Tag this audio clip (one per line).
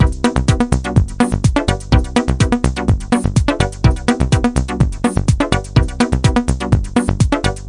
loop
super